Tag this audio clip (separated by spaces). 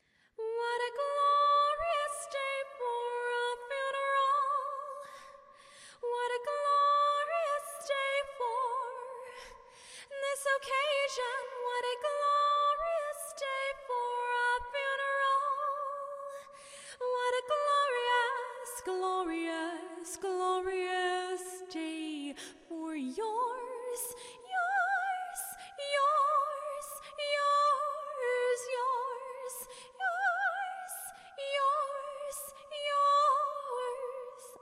goth sing woman female walz soprano singing female-vocal whimsical